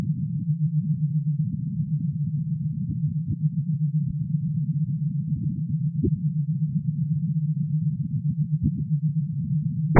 Read the description on the first file on the pack to know the principle of sound generation.
This is the image from this sample:
processed through Nicolas Fournell's free Audiopaint program (used the default settings).
Sounds darker than the previous iteration.
This time I cheated a little bit and tried to rebalance the channels a little bit, as one was getting much louder.
sound-to-image, image-to-sound, iteration, computer, synthetic, image